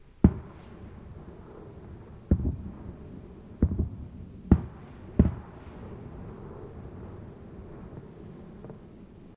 Several firework cracks in the distance in open field.